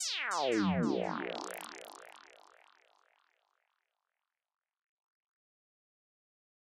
Diversion Squelch 1
Pretty self explanatory, a classic psy squelch :)